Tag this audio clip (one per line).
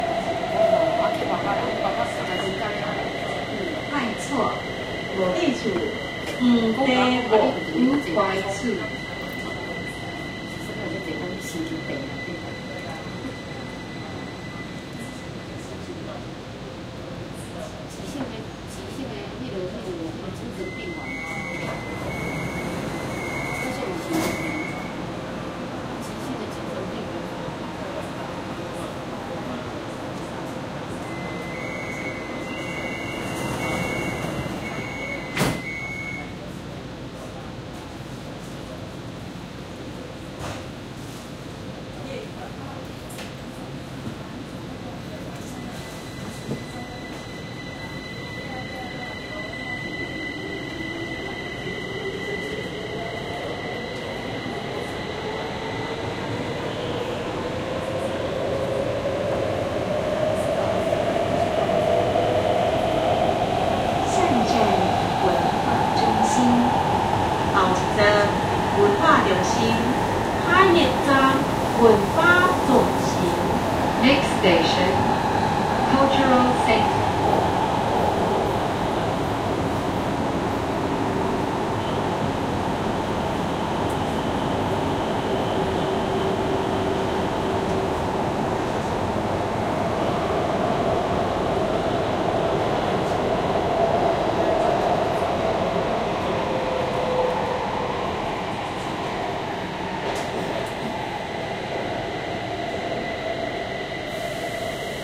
Interior
MRT
Busman
Announcements
Taiwan
AT825
DR680